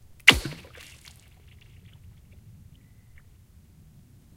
Ice Hit 7
melt, foley, ice, ice-crack, crack, break